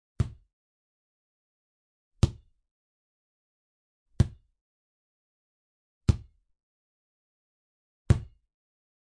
01 Basketball - Dribbling
Dribbling a basketball.